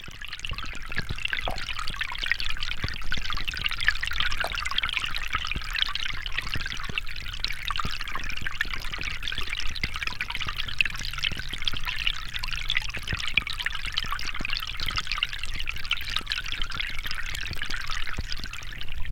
mayfield water flowing02
Underwater recording made with a hydrophone in a creek at Mayfield Park in Austin, TX
flowing, bubble, bubbly, creek